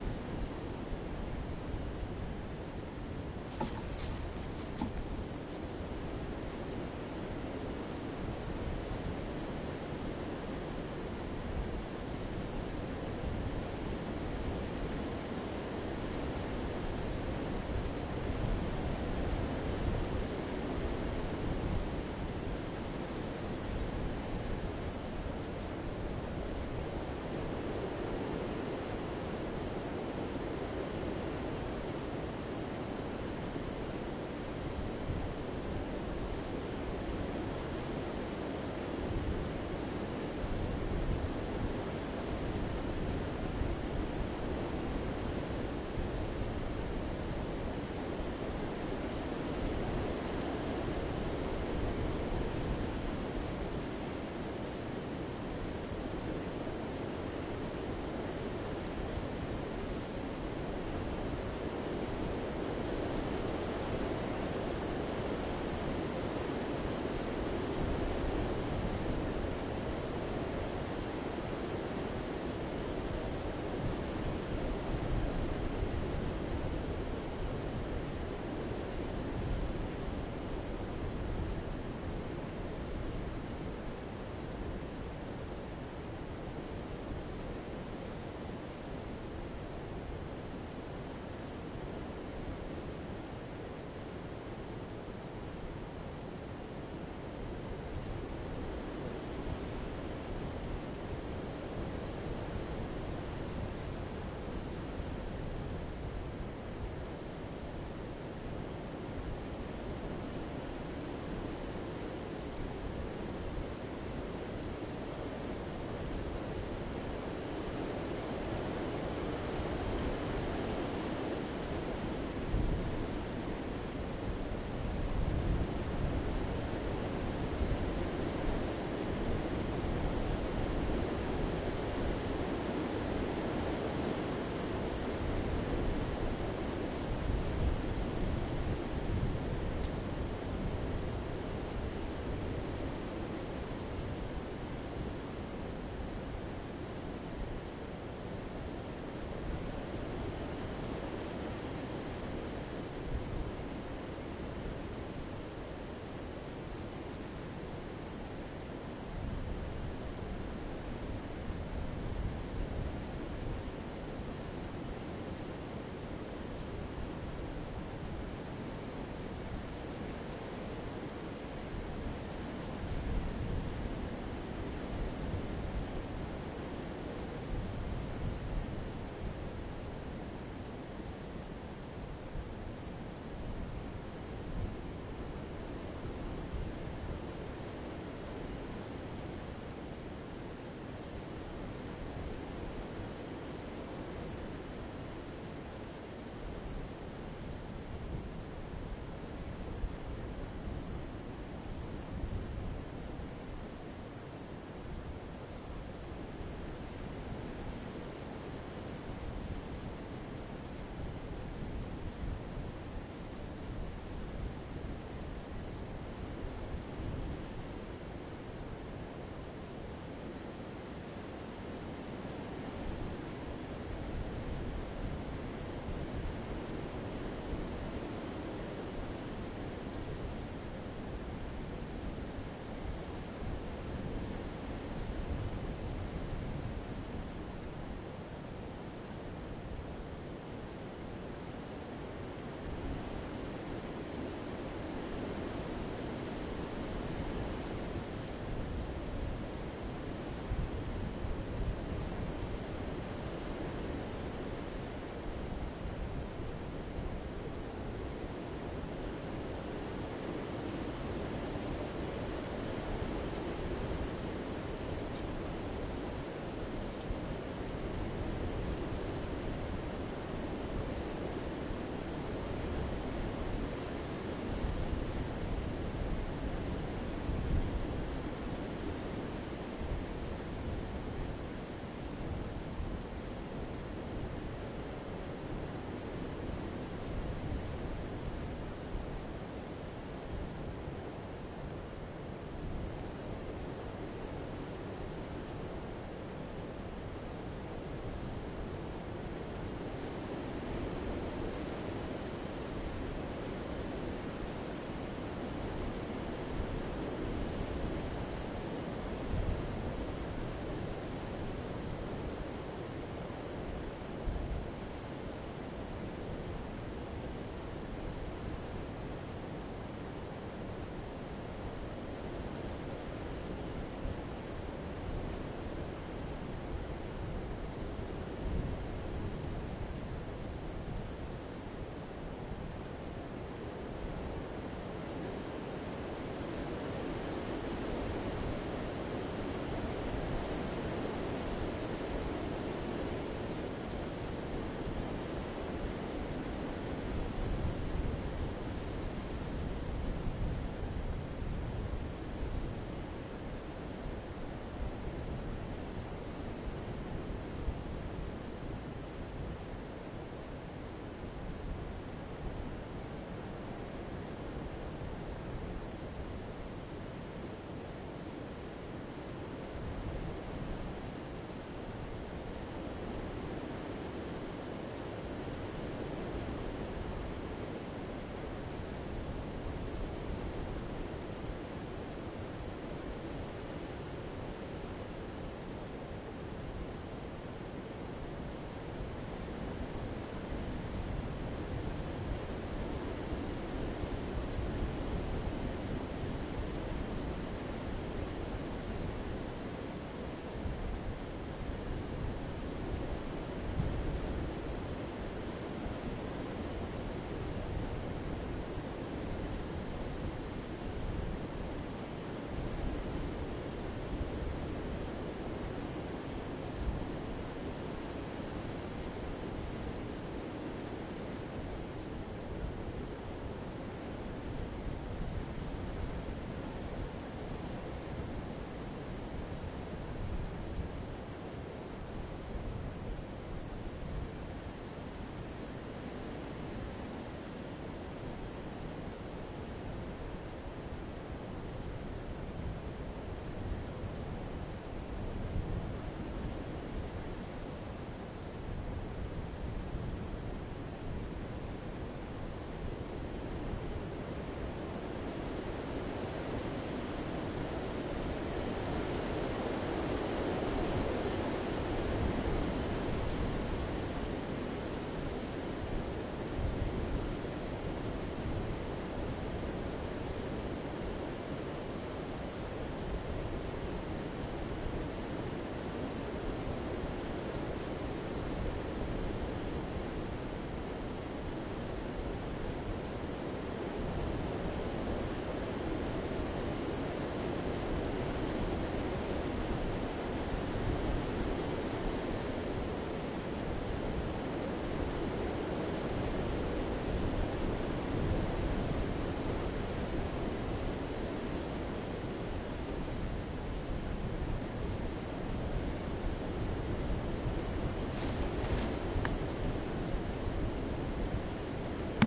strong wind in the forest rear
day, forest, wind, windy